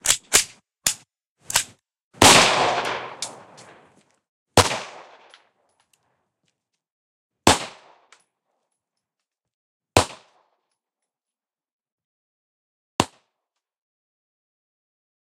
Handgun Clip Magazine Shot
magazine, bullet, handgun, pistol, rifle, shooting